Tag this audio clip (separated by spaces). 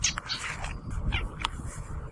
field-recording frogs nature outdoors